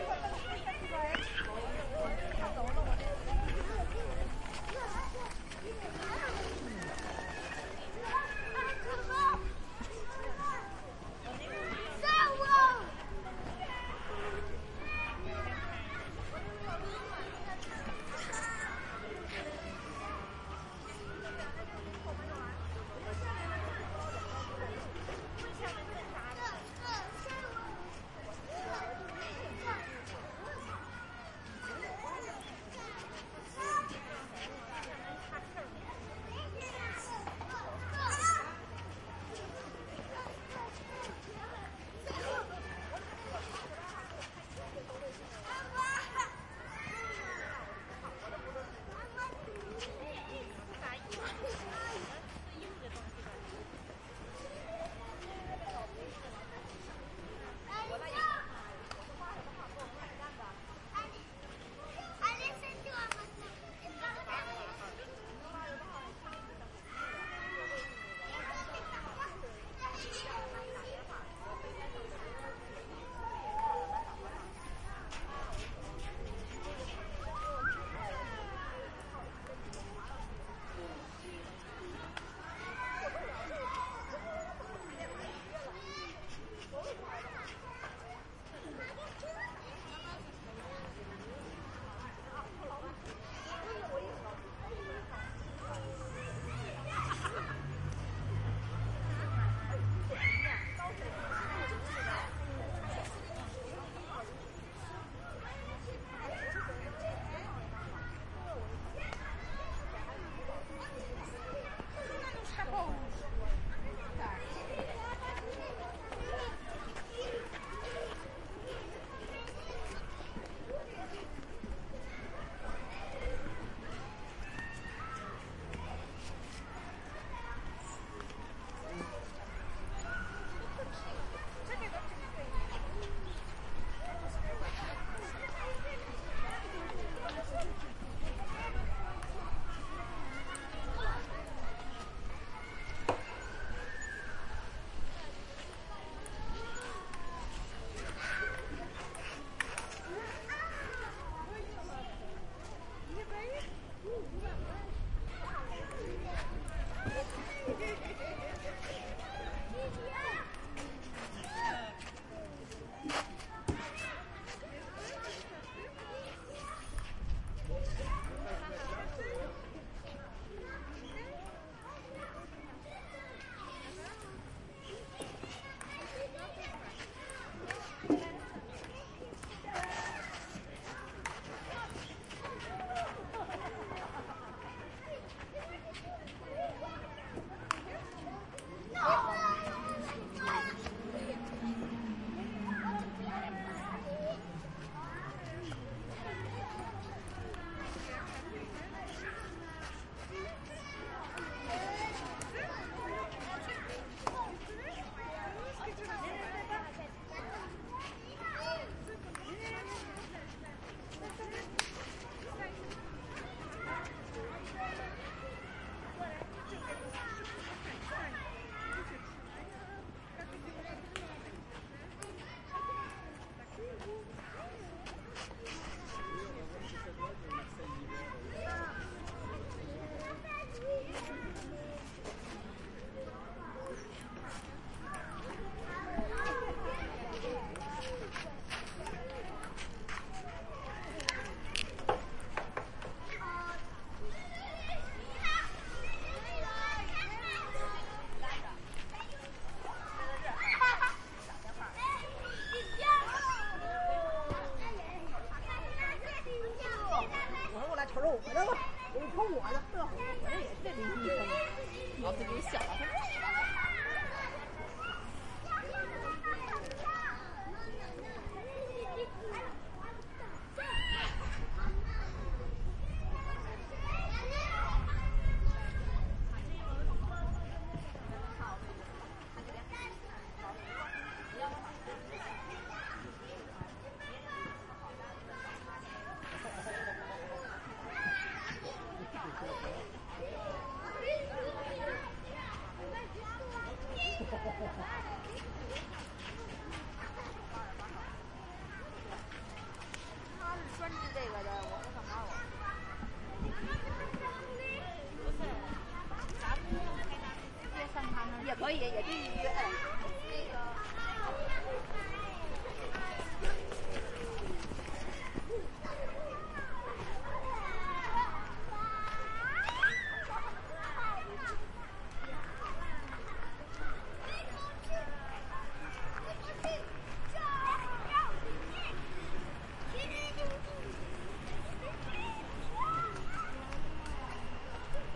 009-Ambiant Recording Parisian park
Blumlein stereo (MKH 30) a small parisian park with children 4 P.M. a saturday in december, asian and french voices, people playing ping-pong
children, voices, Park, Ping-pong